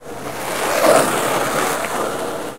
Skateboard rolling past. Recorded with a Tascam DR-44WL.
skateboard, skateboarding, skating